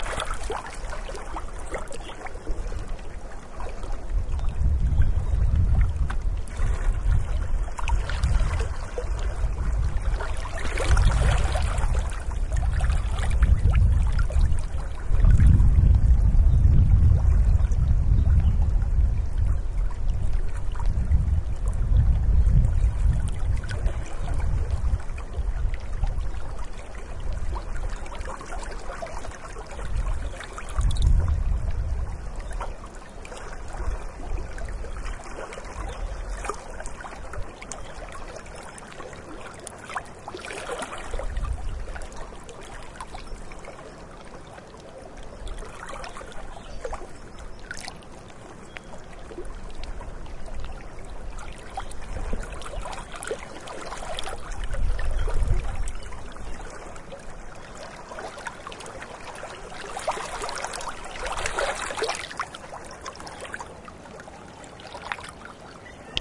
Lake St Clair 3
Lake gently lapping the shore. Birds quietly in background, occasional wind. Recorded on LS10 in Lake St Clair, TAS, Australia
atmospheric, australia, birds, field-recording, lake, tasmania, water